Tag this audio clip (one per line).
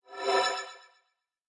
heal
bell
tonal
build
Whoosh
up
reverb